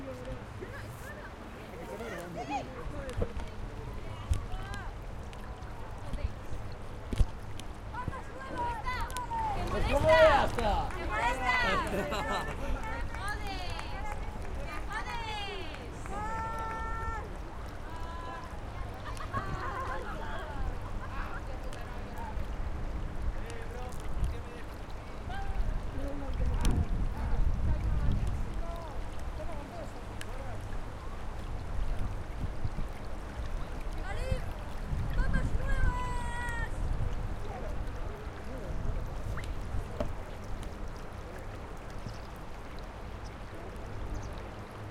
20211010 RiuBesòsGespaPontStaColoma Traffic Humans Voices Noisy Complex
Urban Ambience Recording at Besòs River by Santa Coloma bridge, grass field with teenagers playing, Barcelona, October 2021. Using a Zoom H-1 Recorder.
Complex, Humans, Noisy, Traffic, Voices